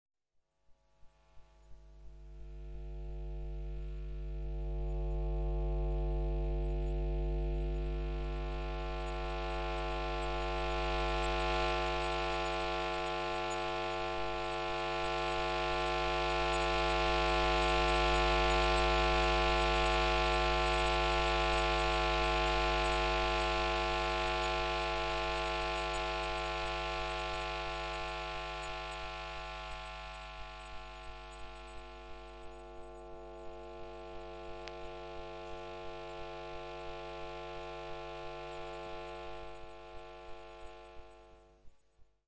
Lecture Hall Mains
electronic, experimental, sound-trip